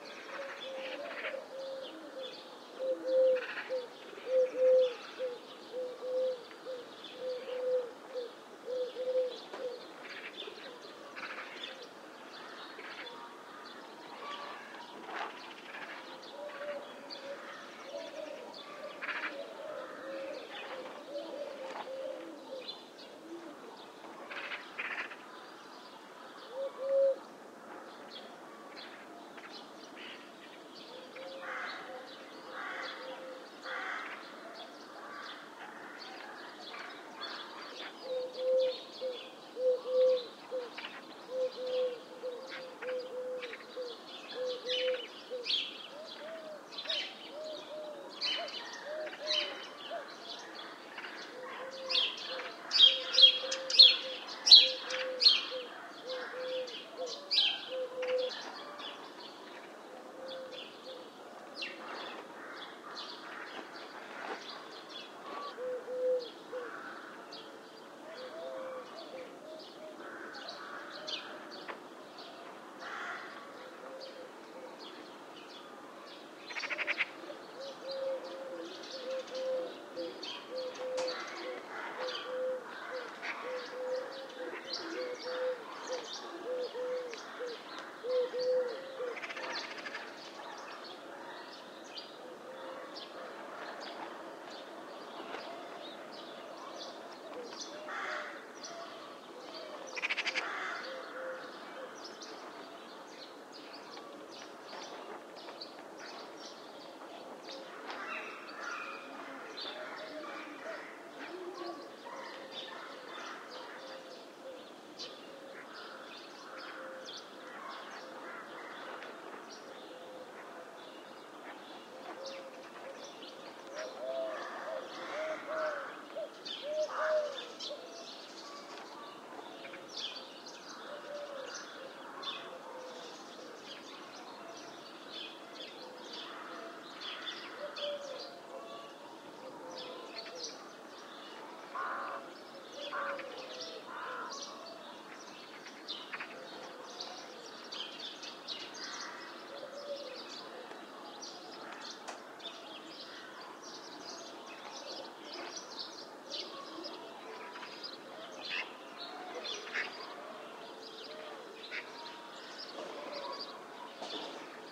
Park Birds Streptopelia Decaocto and Different High Pitch Birds Mono
This ambient sound effect was recorded with high quality sound equipment and comes from a sound library called Summer Ambients which is pack of 92 audio files with a total length of 157 minutes.
nature, pond, pitch, atmosphere, single, birds, crane, atmo, ambience, summer, background-sound, water, swamp, cranes, seagulls, bird, bittern, high, soundscape, countryside, eurasian, ambient, reed, warbler, field, meadow, ambiance, background